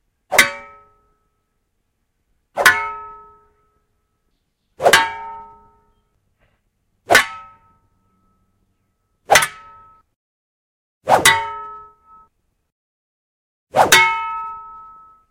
Single clangs
Clangs of as if fighting with shovels.